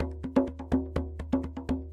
tambour djembe in french, recording for training rhythmic sample base music.